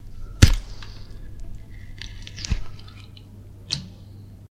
note: these samples maybe useful for horror media.
smiles to weebrian for the inspiration, the salads on me (literally)
(if this sound isn't what you're after, try another from the series)
arm, bones, break, effects, flesh, fx, horror, horror-effects, horror-fx, leg, limbs, neck, squelch, torso